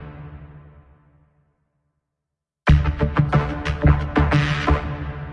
Drum Beat PACK!
beat, drum, pack